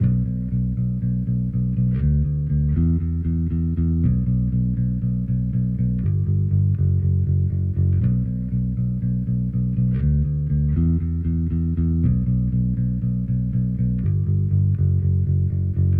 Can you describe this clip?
B Minor Rock Bass Groove